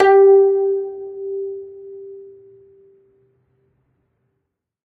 single string plucked medium-loud with finger, allowed to decay. this is string 19 of 23, pitch G4 (392 Hz).